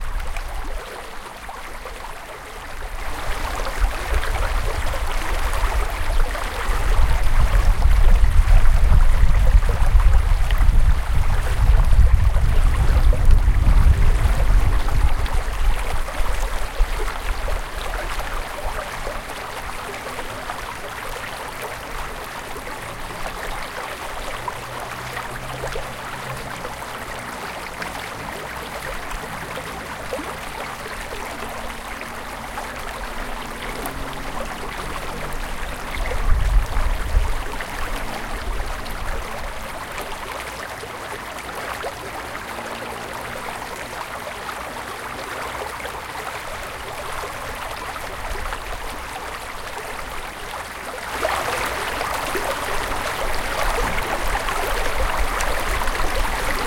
Woodland Stream - 5
flow, flowing, forest, lake, river, stream, water, wood, woodland